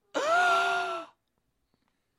Two people gasping in astonishment. Recorded with SM58 to a Dell notebook with an audigy soundcard.